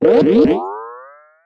sonokids-omni-02
Part of my unfinished pack of sounds for Sonokids, a boingy synth sound.
boing, sonokids, synthesis